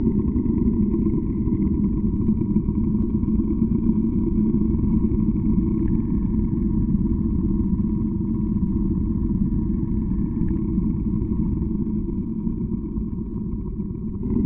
Looping Horror Groaning
A background, looping audio effect of groaning/moaning/whatever you want to call it. Not bad threatening ambiance.
horror, ambient, loop, groan, growl, looping, scary, moan